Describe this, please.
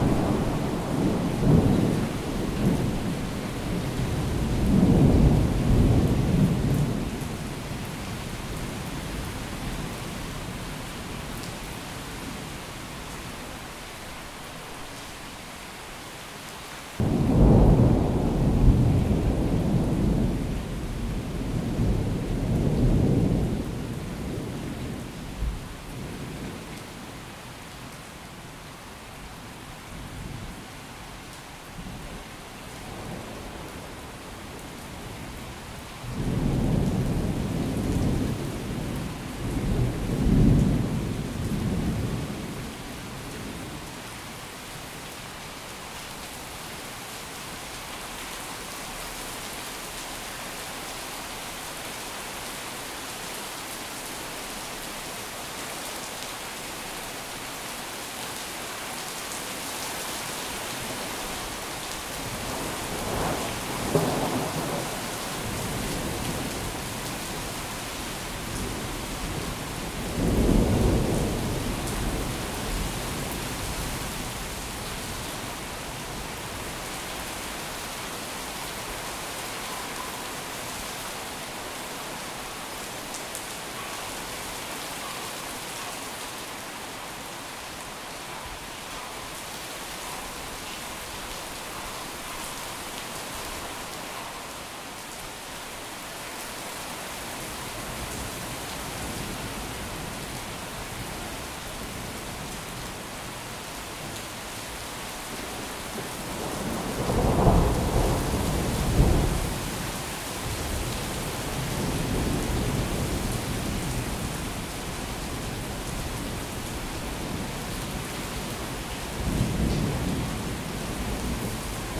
session of heavy rain and thunders at the city